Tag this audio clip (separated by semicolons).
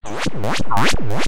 Effect; Magic; maker; RPG; Teleport